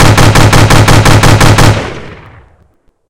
Heavy machine gun burst.